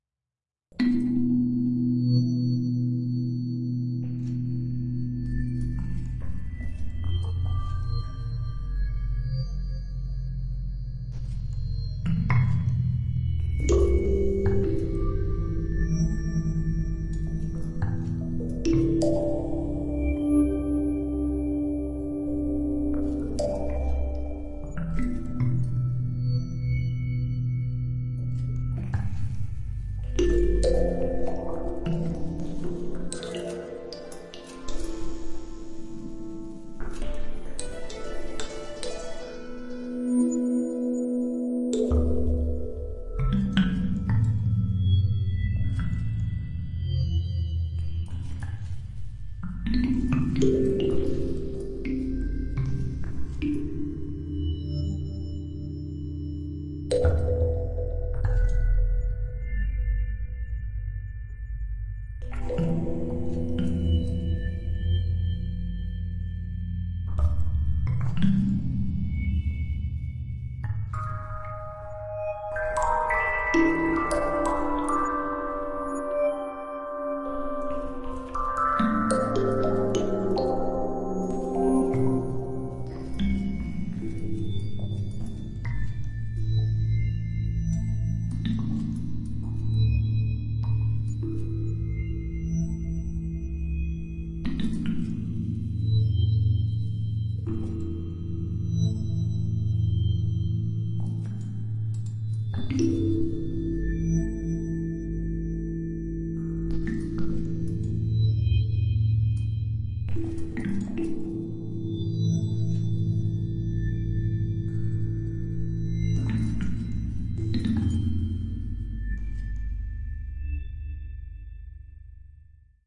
Short soundscapes generated in the percussion synthesizer Chromaphone, a physical modeling synthesizer, recorded live to disk.